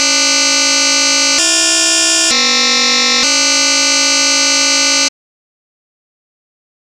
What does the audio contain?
a glitch sound could be used in dubstep. made in fl studio
dubstep, fl, fruity, glitch, inch, loops, nails, nine, studio